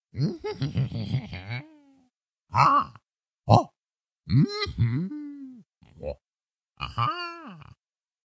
Crazy Man Noises 2
A man peeking out of a hole in the ground. Talk to him, hm?
vocal, male, strange, voice, man, crazy, funny, rpg